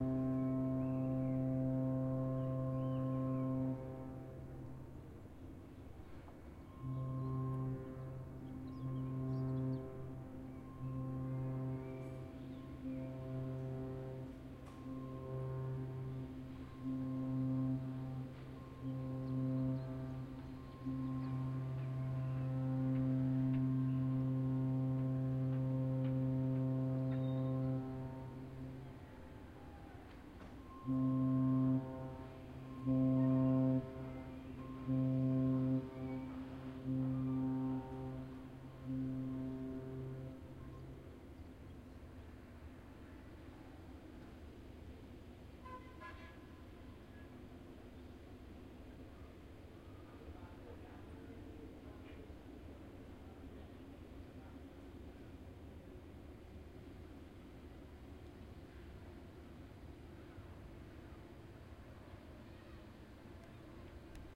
Ambience Lisboa Center Harbor Horn Daytime 4824 01
Lisbon's ambience recorded from my balcony. Birds, people and far ship's horn coming from the harbor. #free4all